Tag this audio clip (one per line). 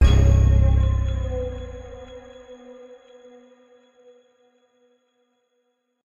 creepy
digital
drama
dramatic
electronic
haunted
hit
horror
metal
scary
sci-fi
shock
sinister
spooky
sting
surprise
suspense
synth
terror
thrill